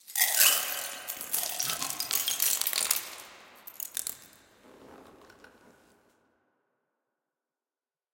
Dropped, crushed egg shells. Processed with a little reverb and delay. Very low levels!
crackle, crunch, crush, drop, eggshell, ice, splinter